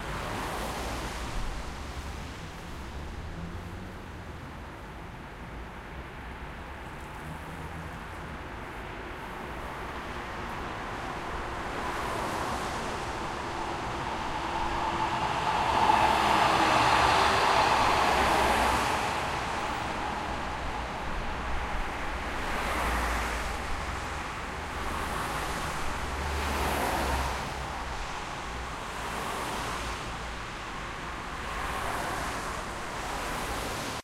Street noise cars and a tram
A field recording in Leipzig/Germany.
Some cars drive on a wet street and a tram drives from left to the right.
Street-noise, cars, field-recording, tram